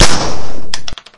This is a self-made recording of a 9mm with sound of casing hitting the ground.
9mm, firearm, firing, gun, gunshot, pistol, shooting, shot, weapon